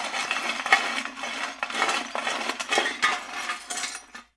Trashy Broken Metal
Broken pottery moving around in a large aluminum brewing pot.
broken, chains, clang, clattering, creepy, glass, halloween, iron, metal, metallic, moving, pot, pottery, rattle, rattling, rumbling, shake, shaked, shaking, smashed